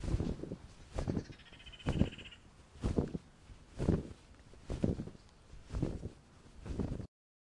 Shaking towel
OWI, towel shaking, wind, Big bird flying, flapping wings, cleaning towel, drying towel, sounds effect, dry wings, flapping sounds, flapping noises
effect, flapping, wind, Wings